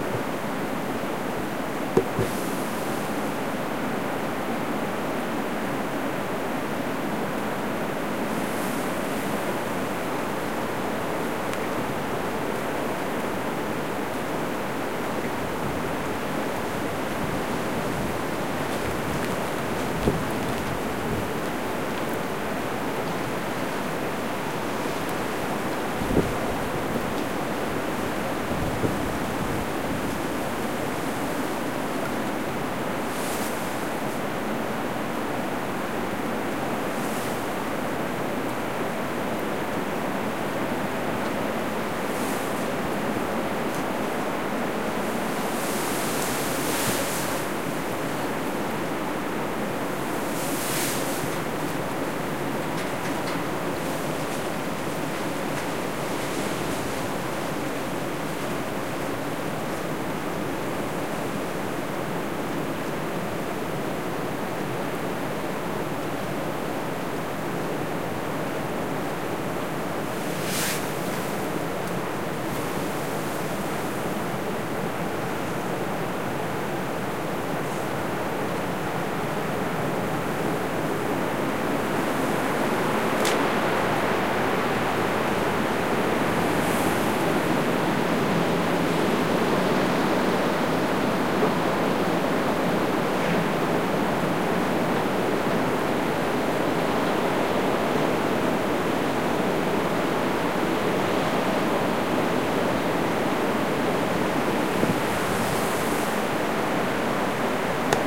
stephanie - the storm 01 - feb 14 - South Portugal
wind, field, recording, ambient, storm